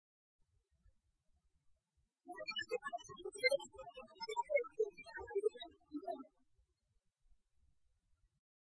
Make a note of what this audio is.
I was doing some recording for this project i'm working on, and the right channel on the Zoom H4 I was using bugged out, and this is the result. The only processing done was the removal of the left channel.